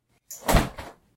Jumping Human
The sound of clothes shuffling with a human jumping.
Human, Jump, Shuffle